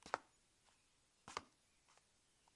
Single Footsteps in Heels on a Hardwood Floor
This is a sound of a single footstep of both the right and left foot in heels.
female, footsteps, hardwood, heels, woman